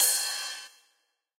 crisp ride
some ride cymbal
ride, hat, cymbal